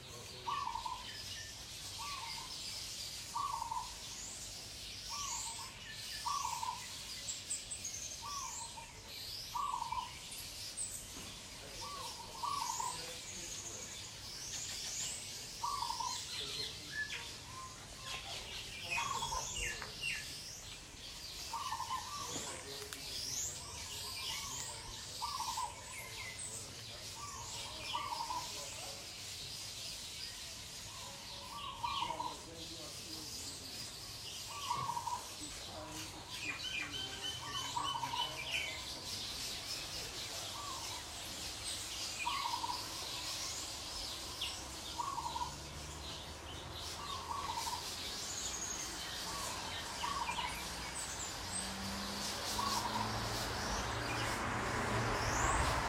Birds chirping in The North
Birds chirping in the afternoon on the Northside of Moris.
Africa, Birds, Field-recording, Nature